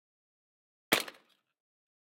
Smashing Can 02
aluminum, beer, beverage, can, drink, metallic, object, soda